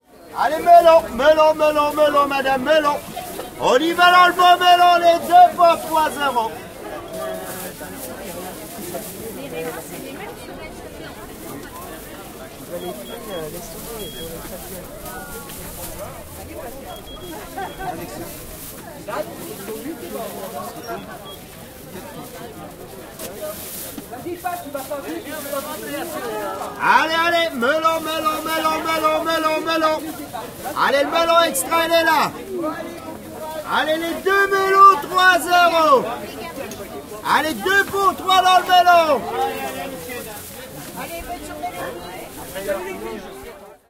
ambiance marche 4
A market place outside of Paris, voices of buyers,fruits and vegetable sellers, typical french athmosphere. Recorded with a zoom h2n.
France, french, language, market-place, Paris